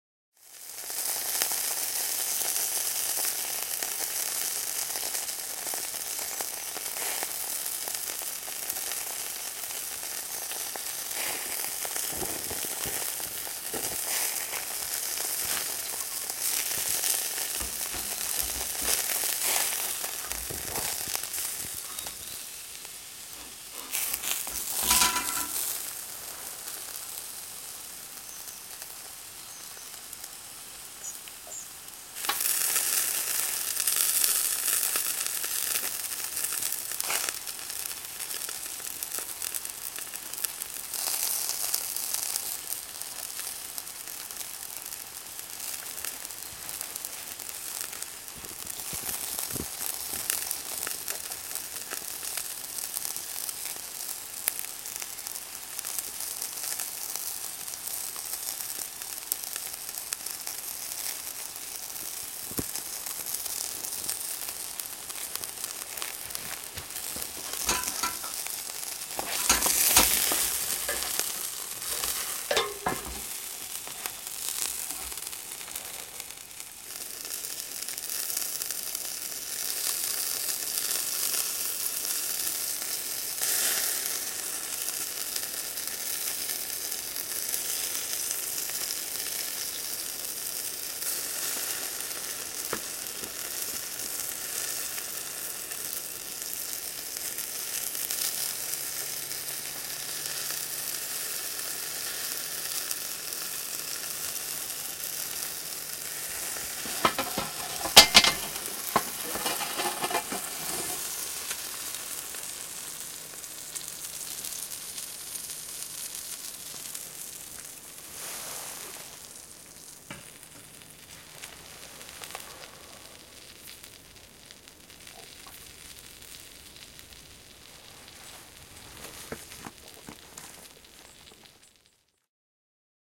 Metallinen ulkogrilli. Grillataan makkaraa. Välineiden kolahduksia, rasvan tirinää. Makkaroita käännellään välillä. Lähiääni. 1'48" ritilä pois grillistä ja siiretään kauemmas. Paikoin vähän lintujen ääniä.
Paikka/Place: Suomi / Finland / Kitee, Kesälahti, Ruokkee
Aika/Date: 12.08.2001